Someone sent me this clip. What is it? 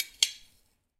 kitchen drum percussion jar tap sound hit
percussion, spoon, jar, sound, kitchen, drum, tap, hit